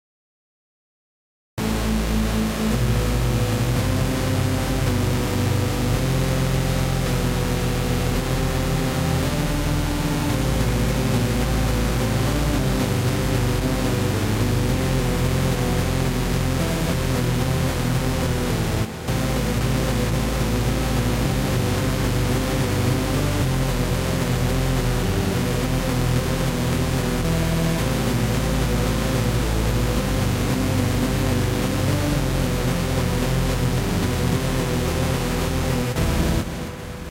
Epic Hook Synth
128 BPM EDM synth stuff
techno, house, dubstep, drop, bounce, 128-BPM, EDM, synth, trap, glitch-hop, trance, dub-step, electro, club